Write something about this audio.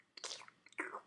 Slime attack or movement
Slime attack or monster.
game, fx, video-game, mouth, movement, slime, vocal, goo, sound, sound-effect, attack